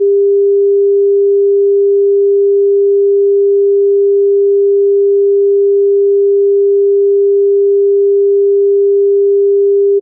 Set computer volume level at normal. Using headphones or your speakers, play each tone, gradually decreasing the volume until you cannot detect it. Note the volume setting (I know, this isn't easy if you don't have a graduated control, but you can make some arbitrary levels using whatever indicator you have on your OS).
Repeat with next tone. Try the test with headphones if you were using your speakers, or vice versa.